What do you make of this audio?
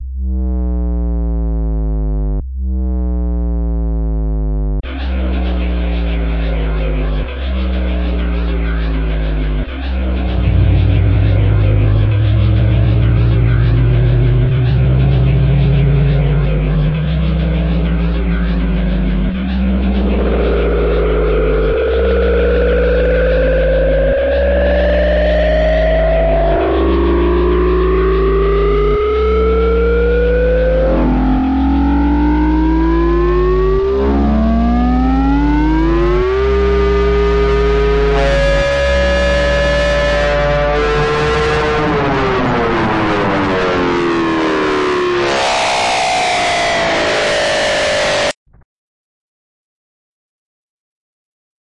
intro-industry
Intro industrial style made in Ableton